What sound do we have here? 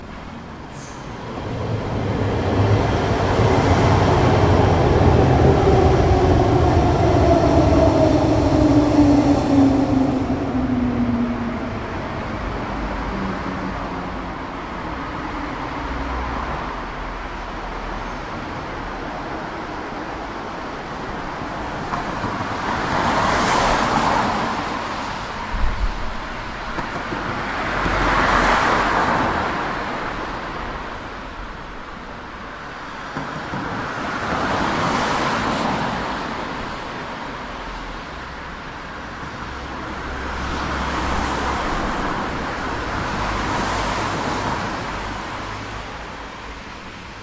Urban Night
Zoom H2 recording of waiting for a tram at an S-Bahn/Tram/Bus station in Berlin.
cars, city, nighttime, night, train, bahn, berlin, traffic, tram, germany, wet, cold